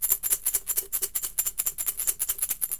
Shaking a small piggybank in front of cheap radio shack condenser mic.
sound, percussion, shaker, household, free, coins, loop